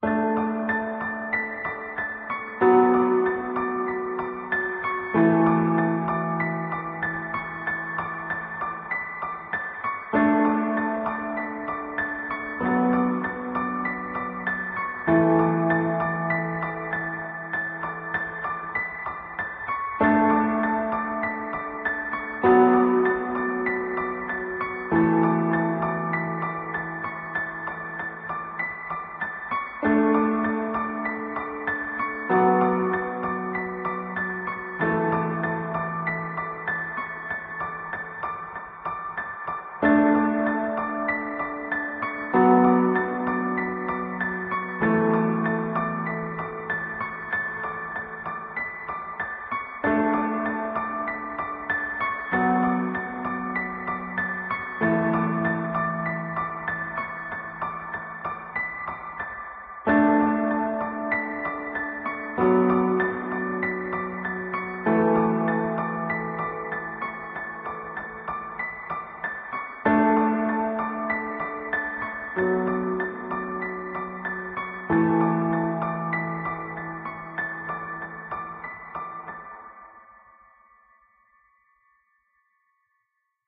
A long piano loop I played on my Casio synth. This is a barely adjusted recording with a record-tapeish chorus already added in the synth.
Loop’s length is to compensate imperfect tempo.
193-bpm, loop